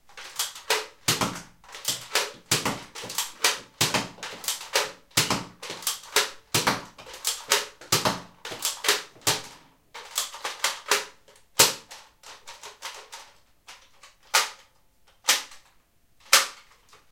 NERF GUN SHOOTING
Nerf N-Strike Maverick REV-6 getting charged and shot.
Recorded with Zoom H2. Edited with Audacity.